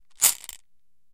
marbles - 15cm ceramic bowl - shaking bowl half full - ~13mm marbles 01
Shaking a 15cm diameter ceramic bowl half full of approximately 13mm diameter glass marbles.
bowl, ceramic, ceramic-bowl, glass, glass-marbles, marble, marbles, shake, shaken, shaking